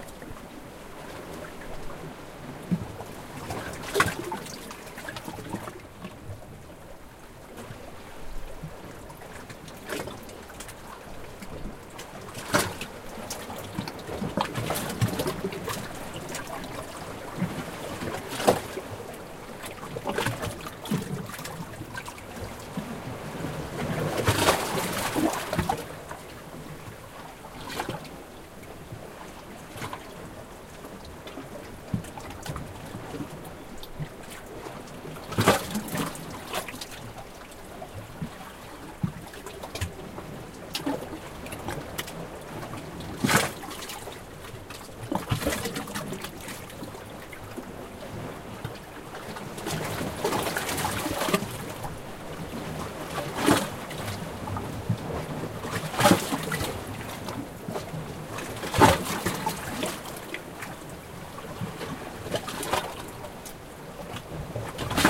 The sound in the seawall from the waves sea